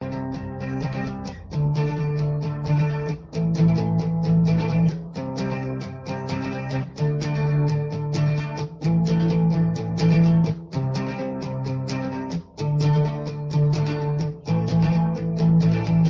Me playing on my Gibson Les Paul electric guitar. I made this so it can be looped and played repeatedly.
fun, music, video